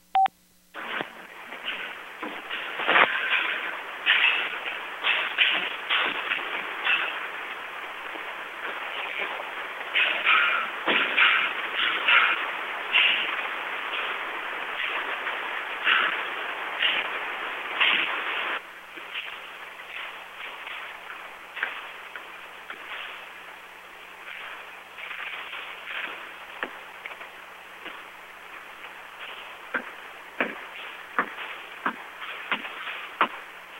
field-recording2
Recorded on Samsung phone in front of a construction site. Using phone only and then recorded with magnetic pickup into cool edit and mastered.
test, field-recording, lofi, cell, phone